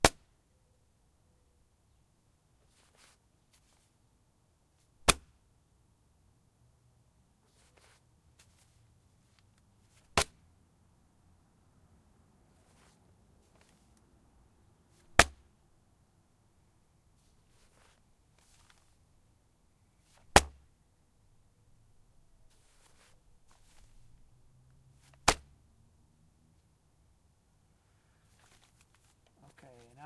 newspapers small soft
gently tossing a small package of newspapers onto a porch
newspaper, small, soft